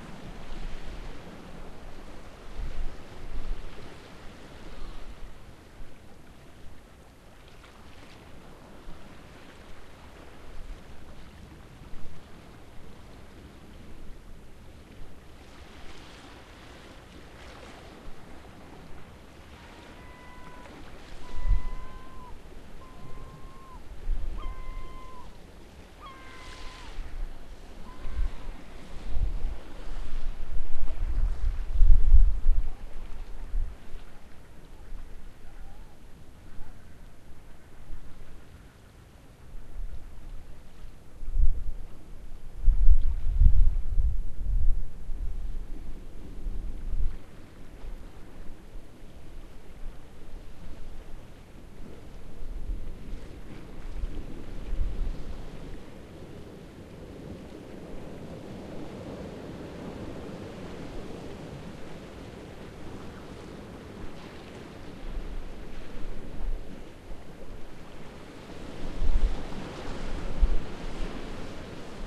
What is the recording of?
Orkney, Brough of Birsay C
Field recording on the Brough on a relatively windless day ..
birsay, scotland